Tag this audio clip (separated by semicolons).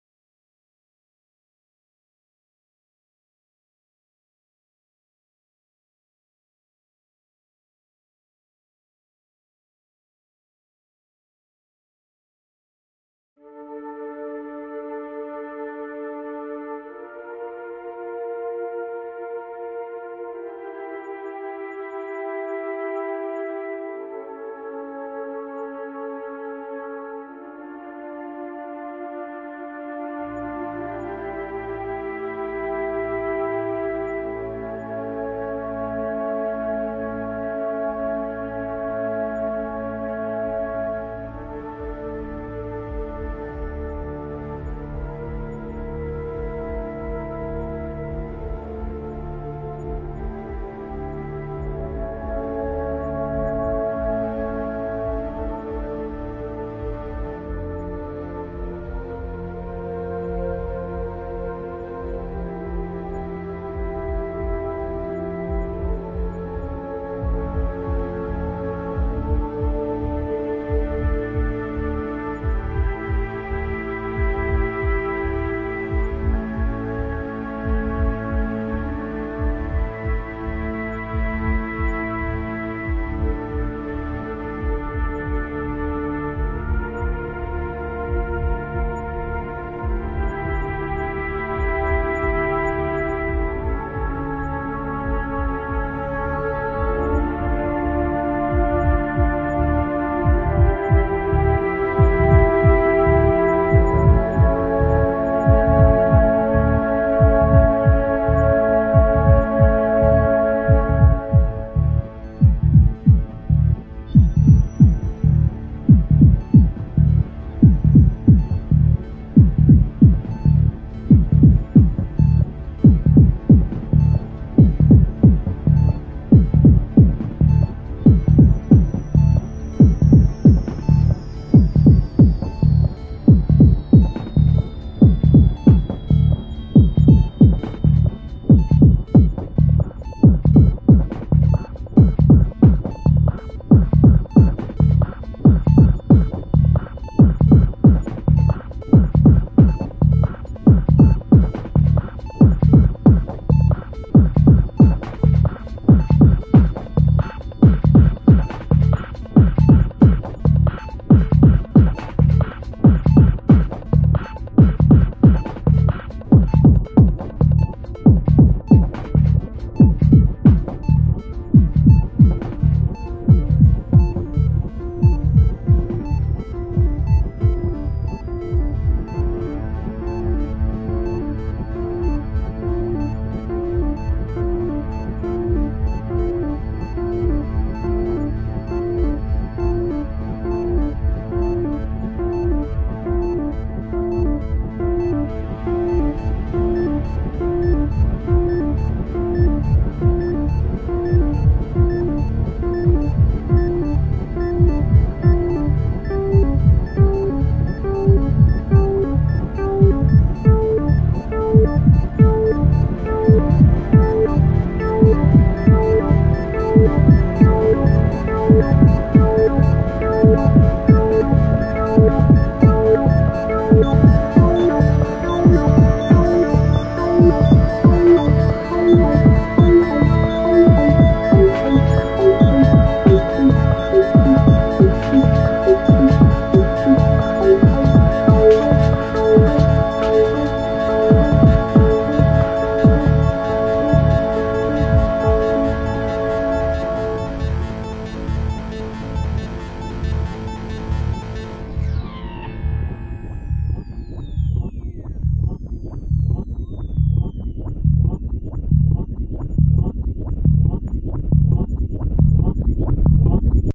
arpegiator; atmosphere; cue; heart-attack; music; pitch; process; synth